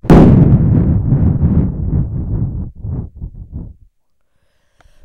Quite realistic thunder sounds. I've recorded them by blowing into the microphone
Lightning, Thunder, Loud, Weather, Thunderstorm, Storm